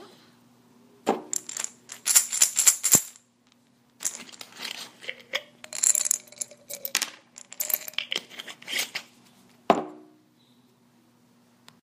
Shaking pills on a glass bottle, opening and closing the bottle
bottle, pills